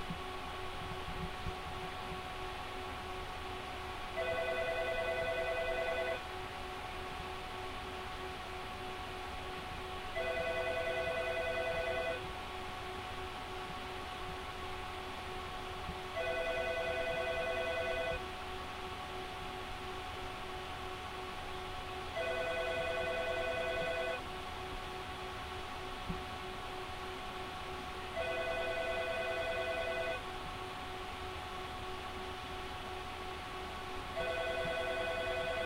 I was trying to record the nosie from the vents in the door of the server room at the office when some rude customer called in the background.
computer, phone, ring, server